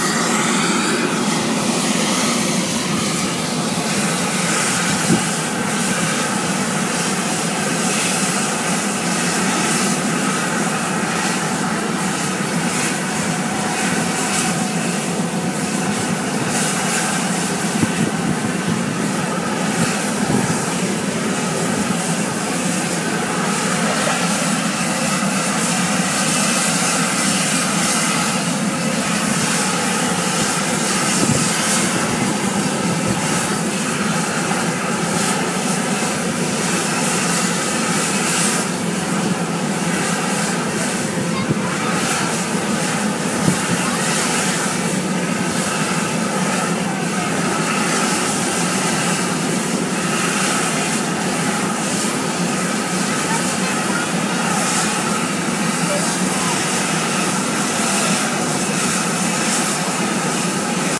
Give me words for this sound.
Red Spouter Fumarole
Field recording from Yellowstone National Park with iPhone 5. Summer of 2104
Red Spouter, which originated with the Hebgen Lake earthquake, exhibits the behavior of fumaroles, hot springs and mudpots. In the spring and early summer its pools splash muddy water that sometimes has a red tone. Later in the summer and fall, when the water table is lower, Red Spouter becomes a hissing fumarole.
soundscape, fumarole, field-recording, Yellowstone